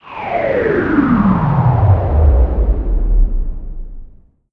Transitional sound we termed a "quick zoom" sound. Sounds like something slowing down or powering down.
Created with this tool:
And this sound: